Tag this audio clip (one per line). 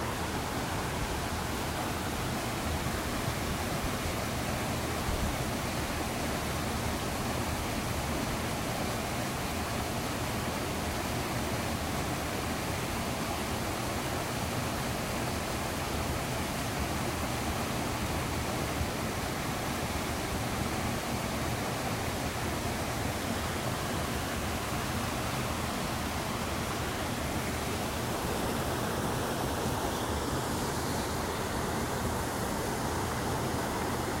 Pingan
rice
terrace
waterfall